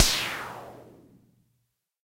EH CRASH DRUM6
electro harmonix crash drum
crash, drum, harmonix, electro